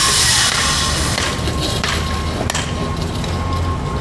A small recording of a construction site
construction, construction-site, hammer, saw